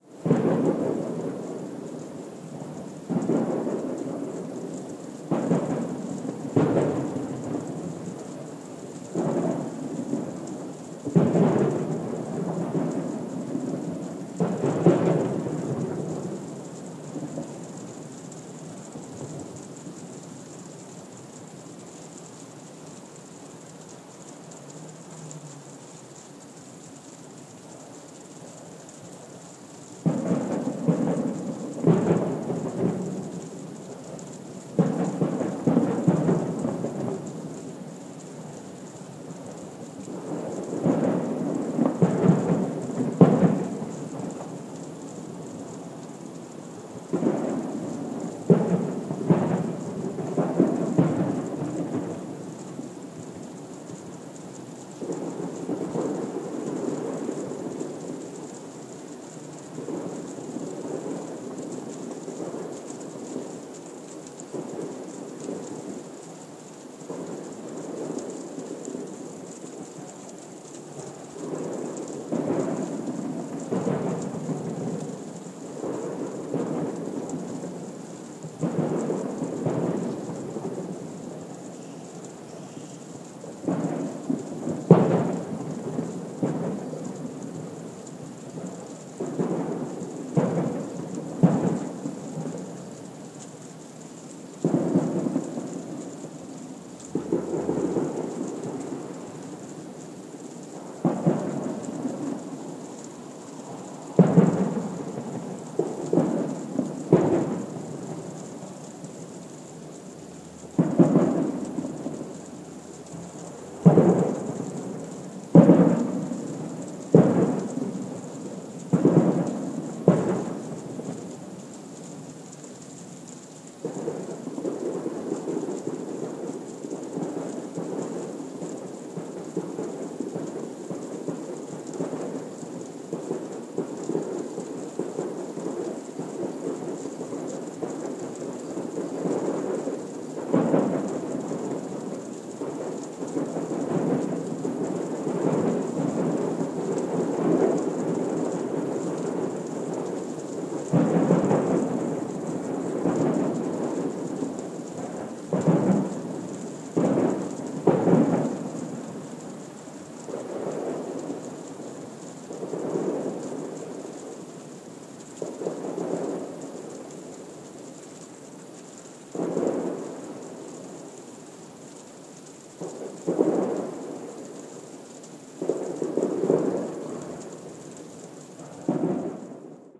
20170503203232 Fireworks Neutral Bay Sydney New South Wales Australia
A field recording of the sound of rain falling softly and fireworks booms, Neutral Bay, Sydney, New South Wales, Australia, 3/5/2017, 20:32.
Australia, Firework, Fireworks, Sydney